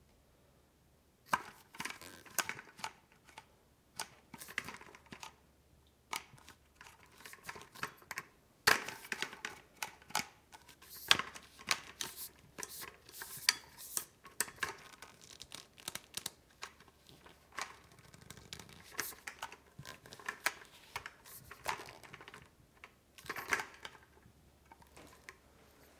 Aluminum walker general handling